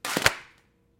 Request for a splashy smashing splat sound. Wet towels and rubber gloves tossed in the air and landing on a concrete floor.
One take.
Recorded with AKG condenser microphone M-Audio Delta AP